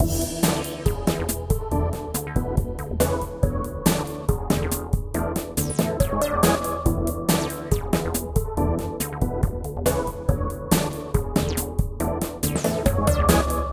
Small Sisters
140bpm, E, loop, Mixolydian, music